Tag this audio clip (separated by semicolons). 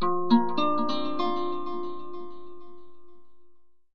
spell; guitar; charm; magic; effect; reveal